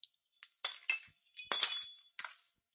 One of almost 300 sounds from the FREE Breaking Glass Sound Library.
Check the video from the recording session: